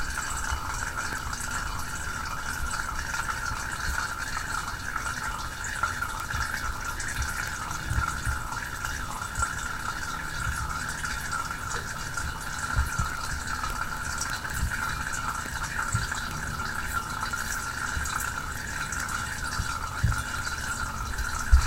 Refilling a Berkey water filter.